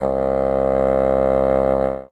fagott classical wind
fagott, wind